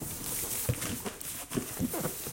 Cardboard Box Rustle 4
A friend moving his hands around a cardboard box. The box had tape on it, hence the slight rustle.
box, cardboard, crumpling, crunch, rustle, rustling, tape